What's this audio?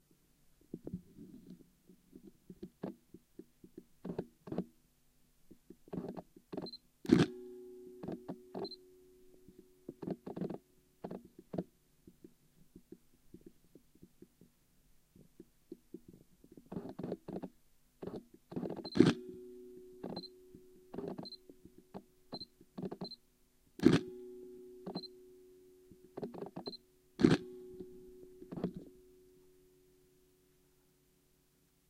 Nikon Telezoom working
The noise of 300 mm lens motor when seeking focus. Mixed with noise when the digital camera's (SLR) reflex mirror is lifting/closing every time the camera trigger is depressed.